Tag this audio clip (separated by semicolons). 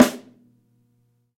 snare; artwood; heavy; tama; kit; drum